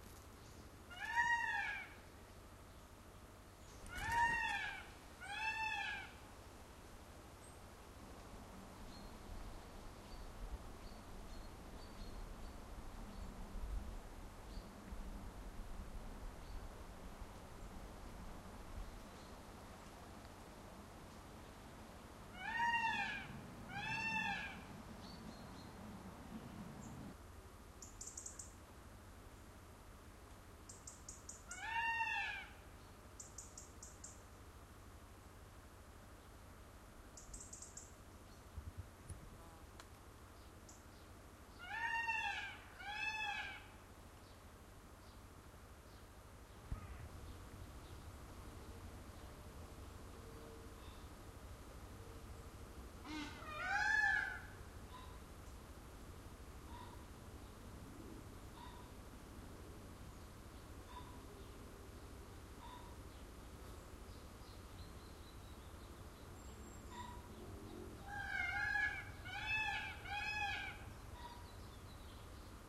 Several examples of a peacock calling, all from one session. In reality the bird calls about once in two minutes, so a lot of dead space has been removed. There are still some quiet background sounds between calls, of vehicles, small bird song, insects buzzing by, a distant crow and a pheasant. The recording was made from woodland near the property where the peacock lives. Location is in SouthWest UK, just inside Dartmoor National Park area.
Recorded with Zoom H1
field-recording, bird-call, peacock